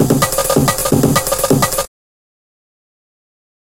4 ca amen
very fast amen break
amen, beat, break, breakbeat, dnb, drum, drums, jungle, loop